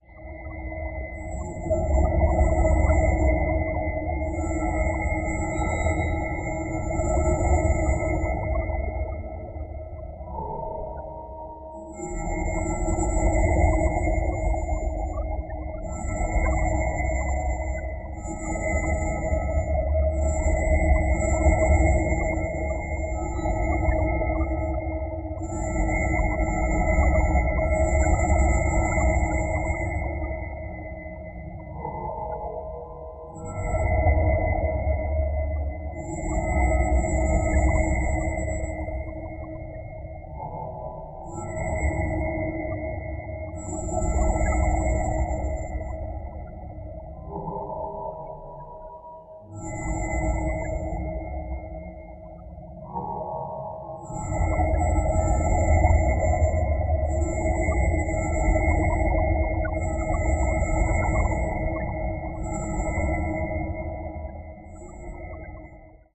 Designed Sci-Fi Atmospheres - Harmonic - 018
spaceship, atmosphere, Sound-design, interference, ambience, soundscape, ui, hum, Sound-Effect, interface, noise, futuristic, space, deep, designed, whoosh, scifi, electricity, fx, sci-fi, sfx, pad, drone, ambient, processed, power, effect, engine, transition